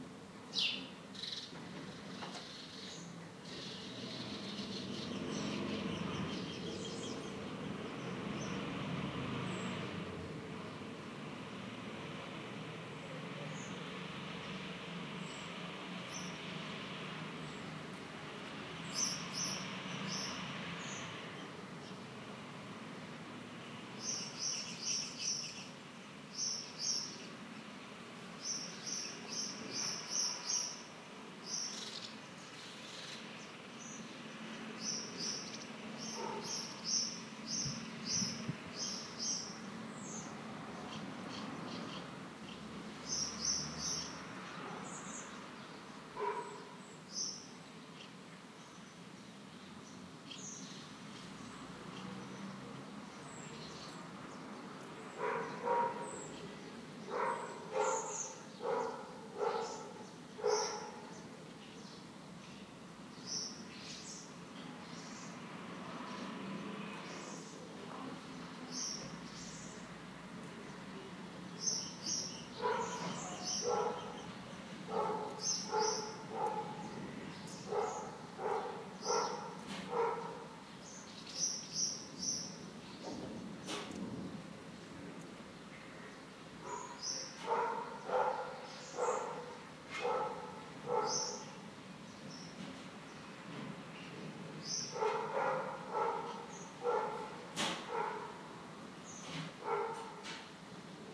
sound-yard-italy-dog-bird
Recording of the ambiance in a garden in italy Sardines
field-recording, bird, ambience, ambiance, birds, italy, village, sardines, dog, garden, yard